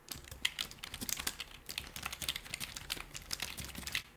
Foley practice keyboard